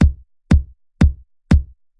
fierce disco punch
a very punchy kick loop